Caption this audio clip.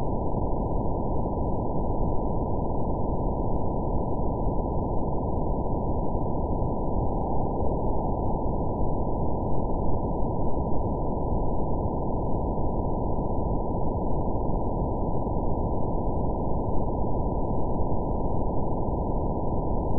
Sequences loops and melodic elements made with image synth. Based on Mayan number symbols.